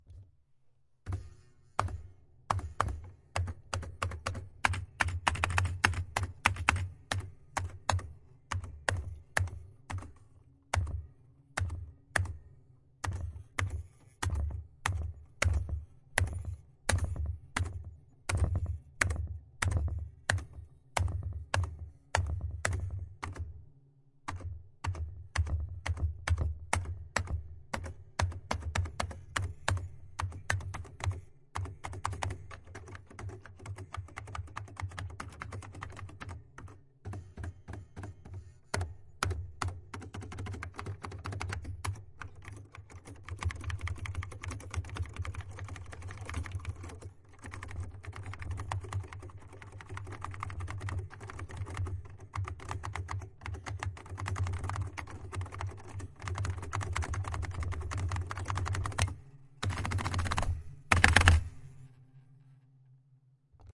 210915 0033 retrokeys ОрельБК-08 ZX
Vintage keyboard "Орель БК-08" (ZXspectrum replica). Recorder - DR100mk3